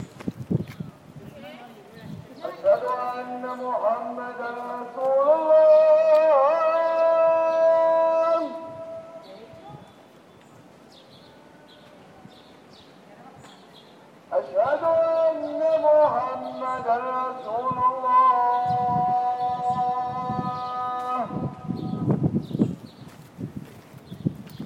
Canto 4 rezo Mohaidin
sings the muezzin from the Minaret of the mosque
islam, call-to-prayer, arab, muslim, mosque, muezzin, morocco, prayer